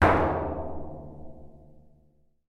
Single hit on a small barrel using a drum stick. Recorded with zoom H4.